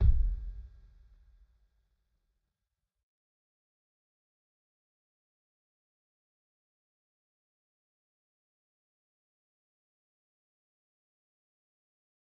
Kick Of God Bed 015
drum, god, home, kick, kit, pack, record, trash